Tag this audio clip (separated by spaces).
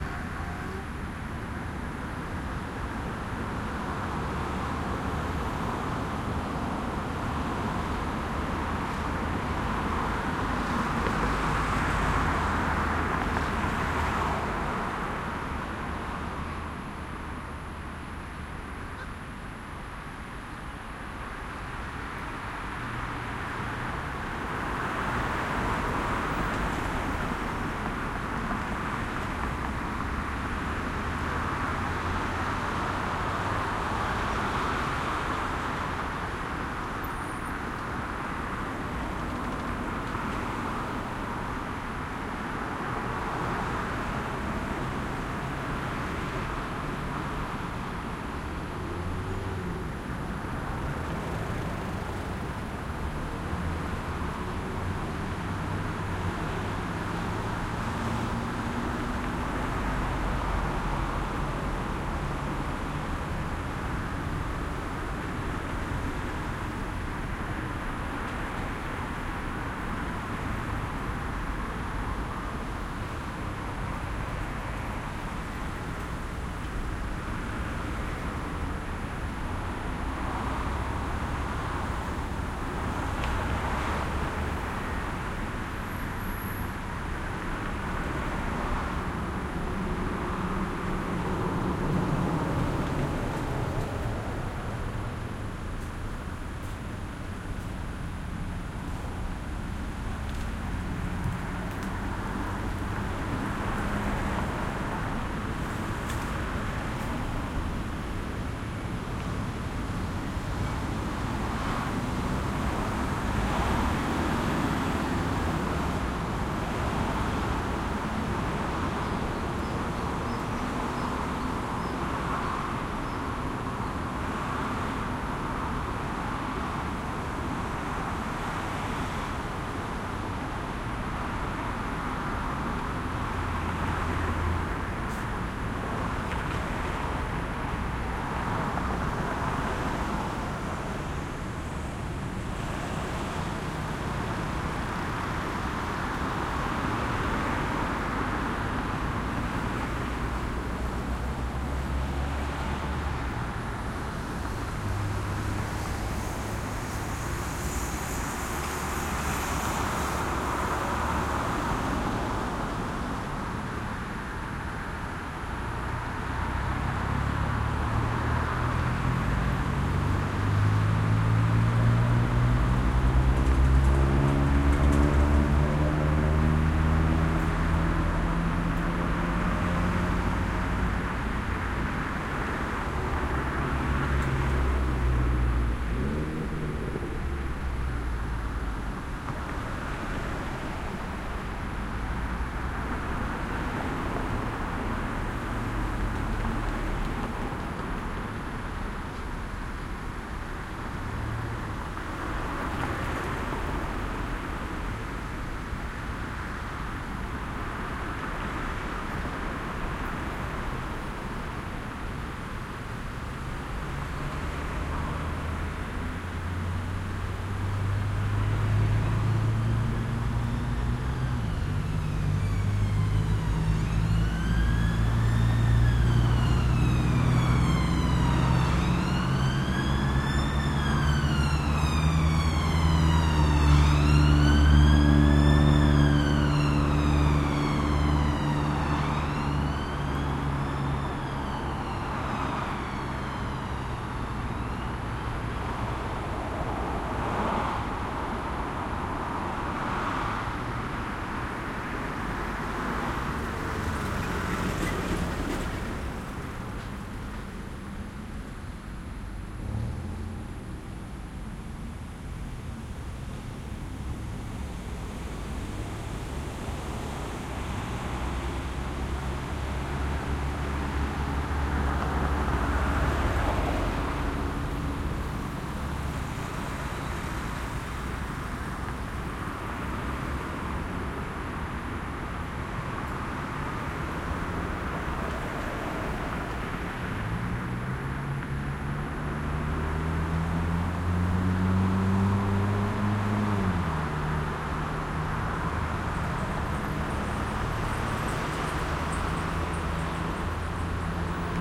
carpark cars motorcycle passing road traffic trafficlight